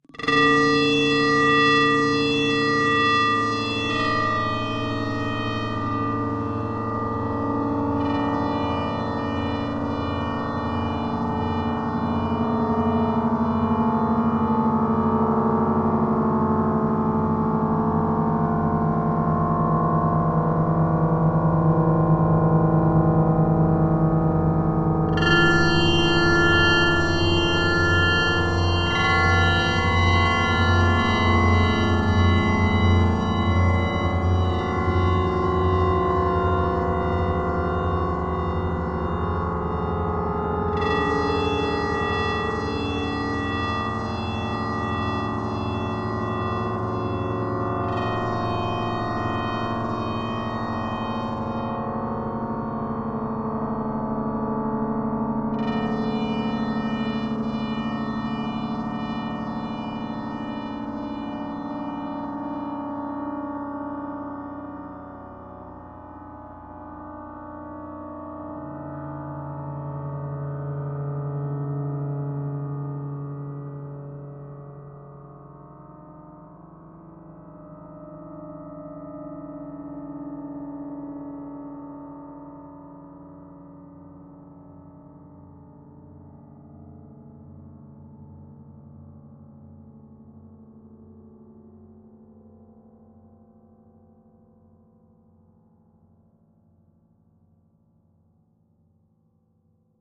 Slow Child of MetaBell
Several sounds generated in Camel Audio's software synthesizer Alchemy, mixed and recorded to disc in Logic and processed in BIAS Peak.
bell, electronic, metallic, processed, resonant, soundscape, synthesized, time-expansion